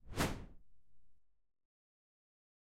whoosh short mid
A simple whoosh effect. Short and middle-pitched.
air, fast, fly-by, gust, pass-by, swish, swoosh, whoosh, wind